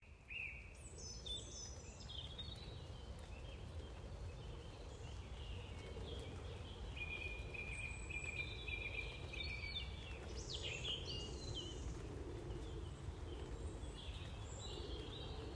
Birds and rain
Rain and birds, aren’t you a spoilt lot.
Birds, field-recording, nature, rain, weather